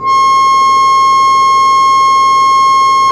single notes from the cheap plastic wind organ
f3, note, organ, single, wind